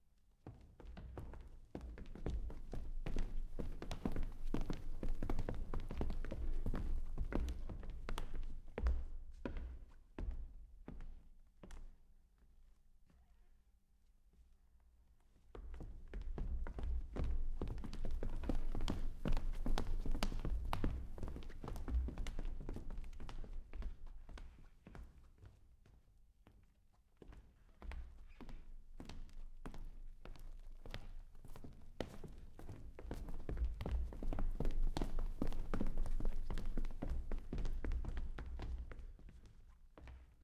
3people walking on stage
3 people (2 women, 1 man) walking on wooden stage in theatre i work.
walk, people, stage